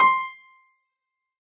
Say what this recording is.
Piano ff 064